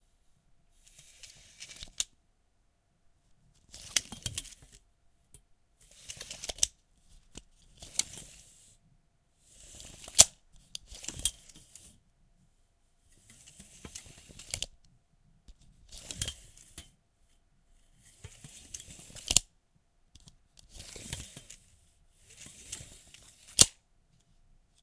Metal tape measure, multiple opening and closing sounds.
opening, closing, multiple, tape, measure